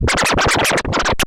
I thought the mouse "touchpad" of the laptop would be better for scratching using analog x's scratch program and I was correct. I meticulously cut the session into highly loopable and mostly unprocessed sections suitable for spreading across the keyboard in a sampler. Some have some delay effects and all were edited in cooledit 96.